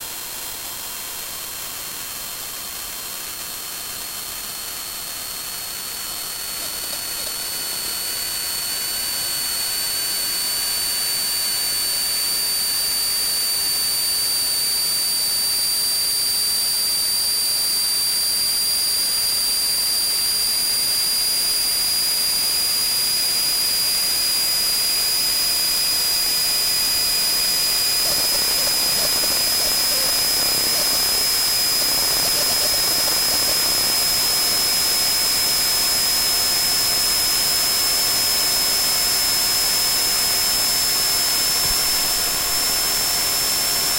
Recordings made with my Zoom H2 and a Maplin Telephone Coil Pick-Up around 2008-2009. Some recorded at home and some at Stansted Airport.
bleep, buzz, coil, electro, field-recording, magnetic, pickup, telephone